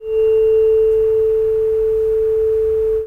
Tuning fork 440 Hz recorder using HP Omen Laptop's native microphone - Stereo recording with one of the channels having bigger magnitude, was put to Mono using Audacity